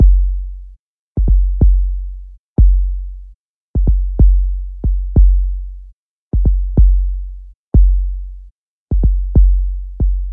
Third of three beats in the pack, simply the bass drum pattern with the claps and snare removed.
bass, drum, Kick, loop